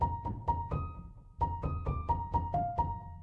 Felted Piano Loop (130 BPM 7 4) 26.19
A short piano melody in 7/4 time played at 130 BPM.